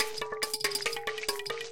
A beat using five samples from edwin_p_manchester's "coke bottle" pack.
140 bpm.
bottle, coke, coke-bottle, drum-loop, drums, soda-bottle